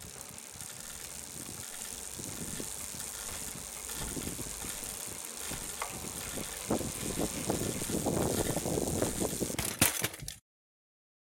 Mountain-Bike Wall Crash